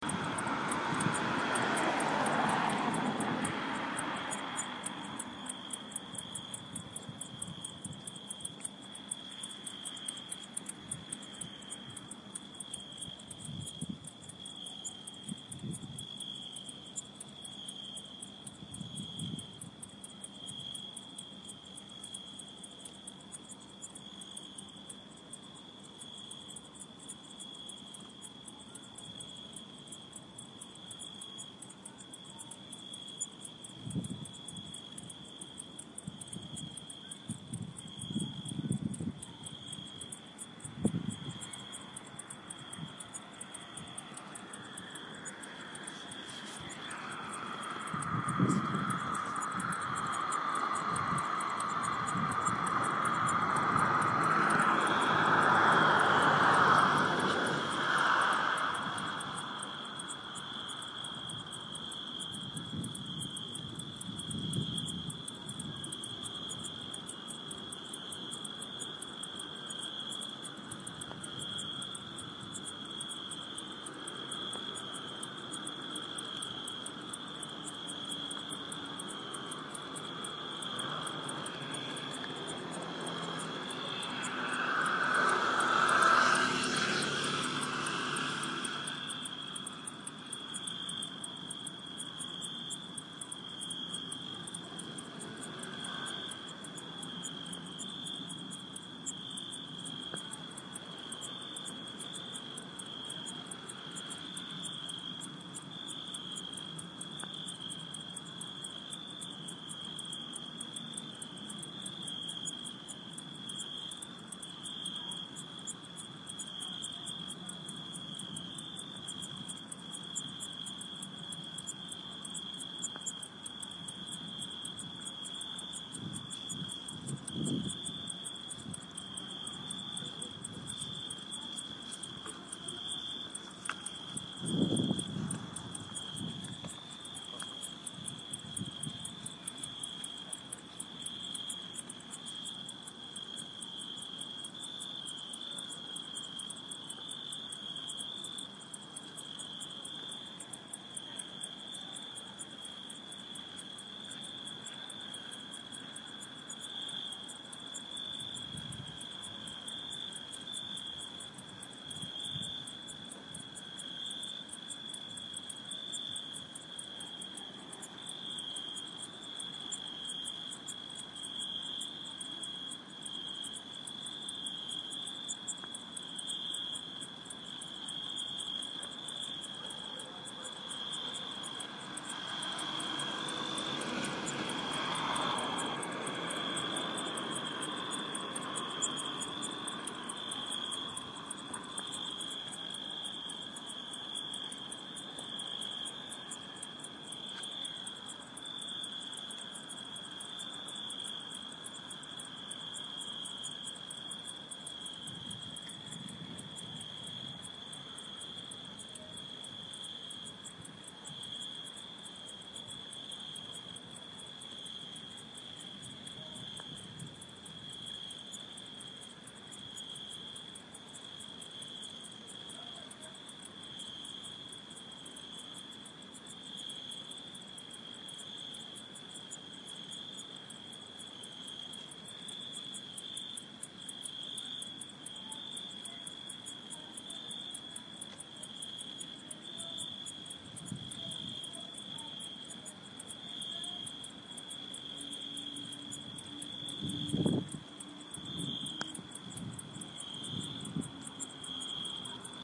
Cicades Singing in the Night